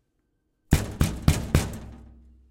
knocking GOOD A 6
Mono recording of knocking on hard surfaces. No processing; this sound was designed as source material for another project.